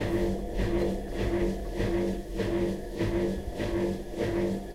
just a washing machine recorded on a Zoom H1
washing-machine, machine, domestic, kitchen, washing